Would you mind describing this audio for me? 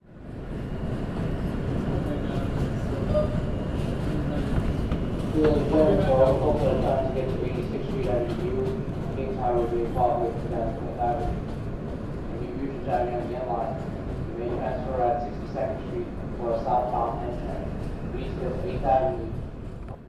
NYC Subway train, in transit, light walla, PA voice
NYC_Subway train, in transit, light walla, PA voice